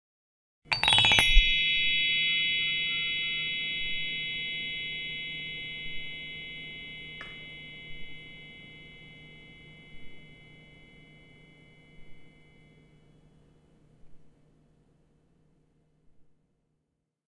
A quick down-sweep stroke made on a toy instrument from Ghana that I picked up at the local mall a few months back. Bit of an overly long tail... could be trimmed a bit at the end.